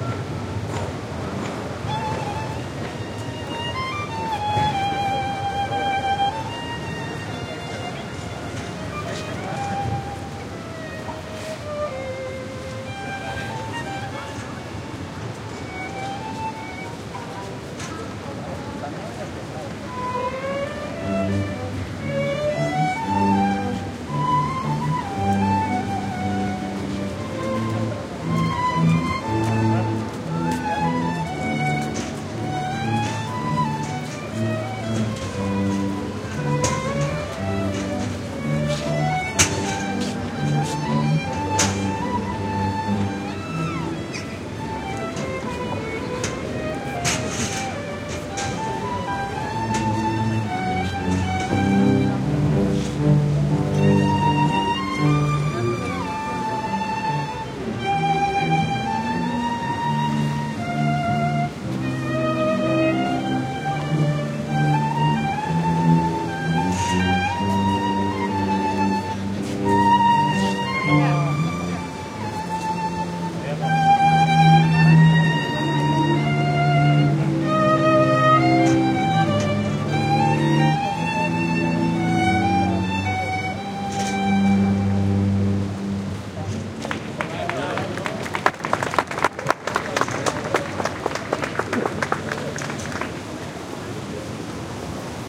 with street noise (and some rumble from a fountain) in background, an ensemble of strings plays a short musical piece followed by applause. Recorded at Plaza de las Tendillas, in Cordoba (S Spain) with PCM M10 recorder internal mics